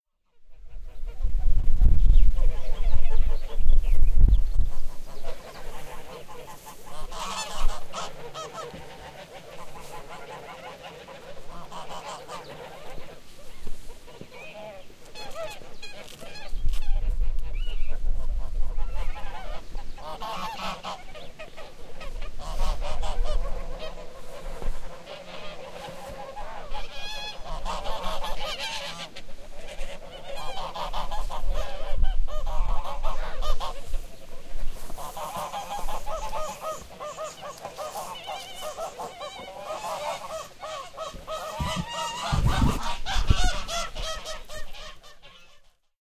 A flock of geese who are honking continuously at Taraldrud farm near Ørje in Norway.
It was recorded by a Zoom H6 and edited in Hindenburg.
field, geese, recording